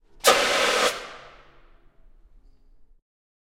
Extintor en taller
Extintor, fire extinguisher
sound, industrial, workshop, mechanical